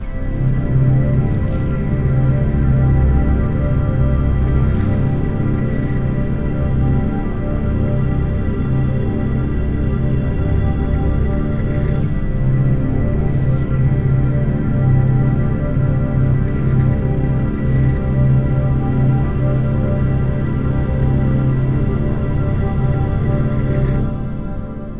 padloop80bpm8bars4
padloop experiment c 80bpm
loop, ambient